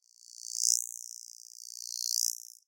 Insect ambience 2
Actually made from a note played on a trombone. I left out panning as these are great sounds to play and experiment acoustic space with.
spooky, ambient, flying, marshlands, bugs, swamp, approaching, distance, creepy, flies, Insects